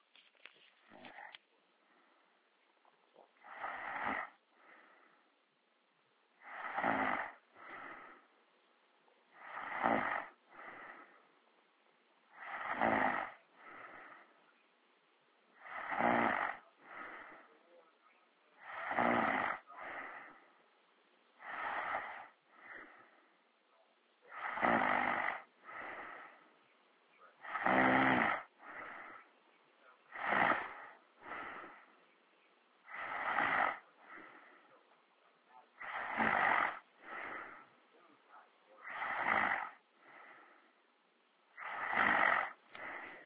The sound of a 23-year old woman snoring while she sleeps.